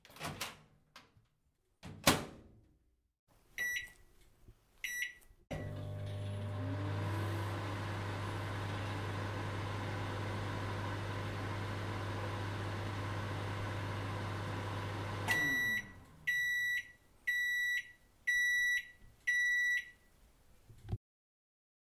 KitchenEquipment Microwave Mono 16bit
messing with the microwave